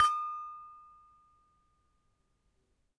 Sample pack of an Indonesian toy gamelan metallophone recorded with Zoom H1.